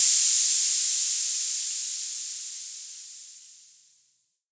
Vermona Hi Hat 12
From the Hi Hat Channel of the Vermona DRM 1 Analog Drum Synthesizer
Analog, DRM, Drum, Sample, Synth